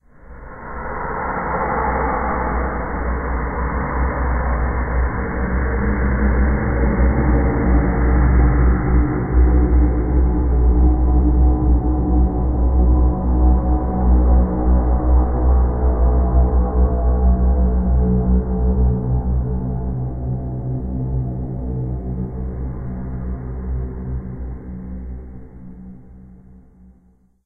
Atmospheric sound for any horror movie or soundtrack.
Scary, Halloween